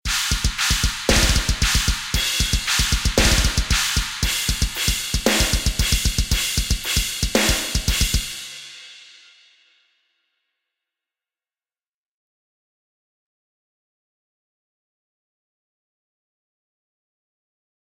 Drum Track 1
Here is a drum beat I made for use in any kind of metal creations, please enjoy and use it to it's full potential!
bass, core, cymbal, drum, heavy, metal, progressive